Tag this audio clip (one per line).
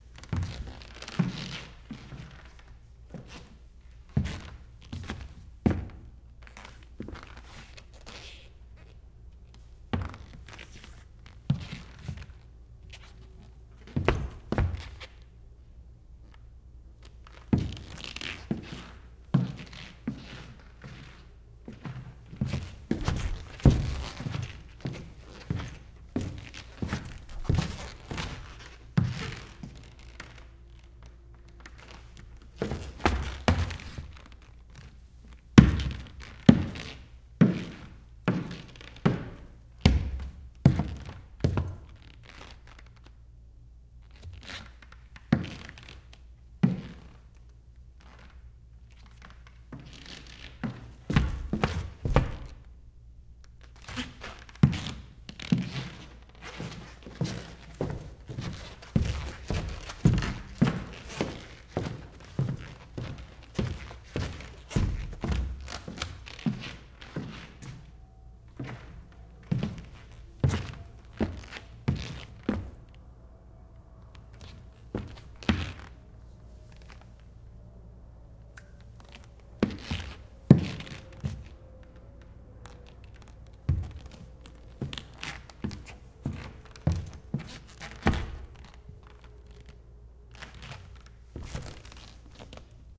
footsteps
pasos
steps